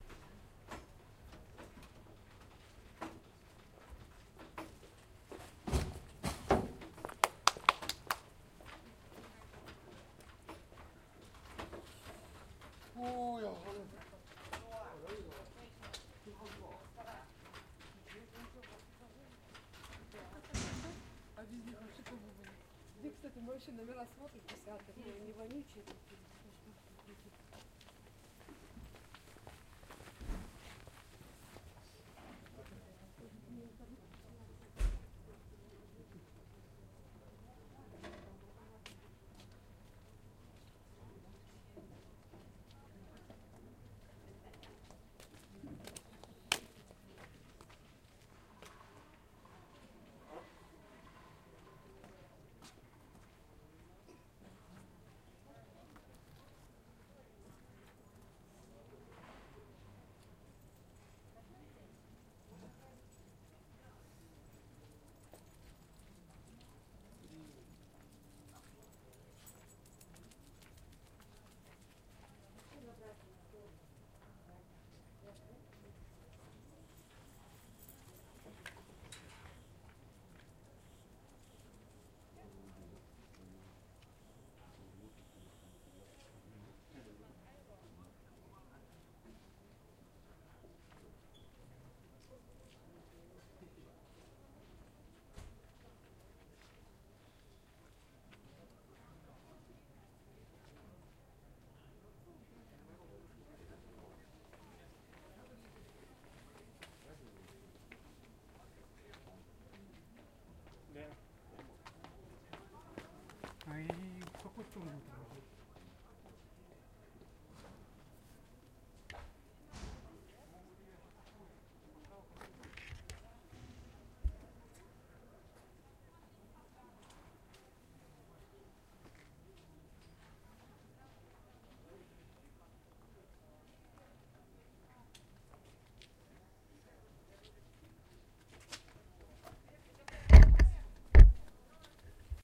Leaving the train and chilling at the station platform. Krasnoyarsk
People get out of the wagon. Announcements at the station, people passing. Recorded with Tascam DR-40.
krasnoyarsk, railway-station